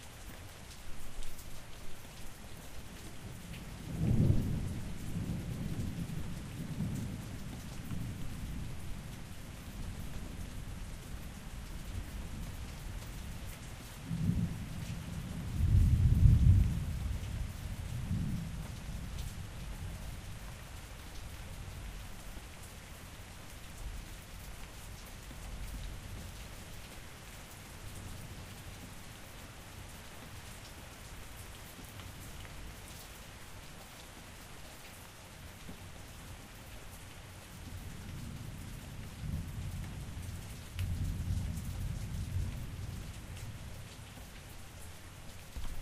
Rain with Distant Thunder